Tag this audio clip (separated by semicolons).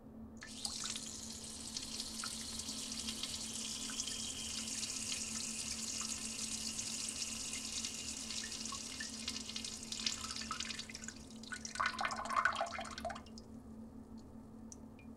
peeing; urination; liquid